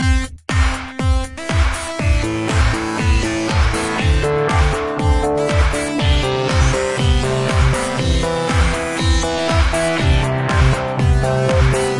dreamscape alternate
loopable, has microphasing, delay and filtering
atmosphere, synth, loop, club, techno, bpm, abstract, trance, dream, 120, dj, beat, dance